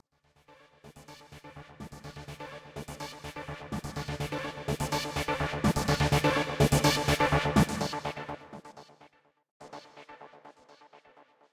Trance lead intro made with Nexus 2 VST , patch Pitchbay